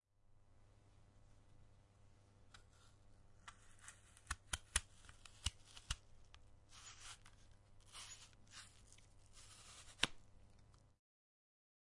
Cutting an Apple in pieces, with a knife.
apple, effects, foley, food, sfx, short, sound-design, sounddesign